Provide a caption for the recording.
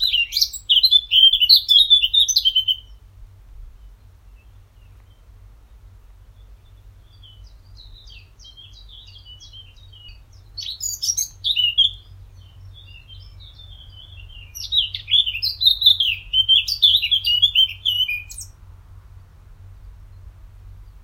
Tweeting birds
Recorded on a walk through the nature again. I was standing directly under the tree where the most present bird was tweeting. Answering birds are much quiter but still hearable.
birds, tweeting